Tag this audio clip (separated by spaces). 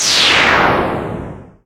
attack games sf game sounds video attacking